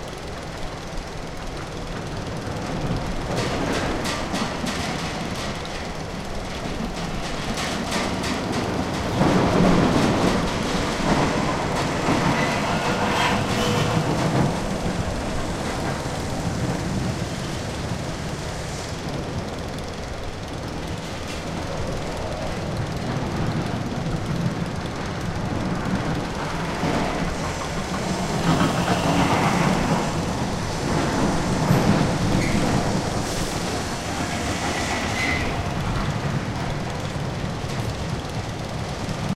Recordings from "Prater" in vienna.